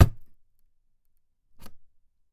Carton impact 13
Those are a few hits and impact sounds made with or on carton. Might get in handy when working with a carton-based world (I made them for that purpose).
Carton, hit, impact, paper, short, sound-design, sound-fx, stab